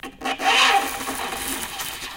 static bird
recordings of a grand piano, undergoing abuse with dry ice on the strings
piano, screech, torture, dry, abuse, scratch, ice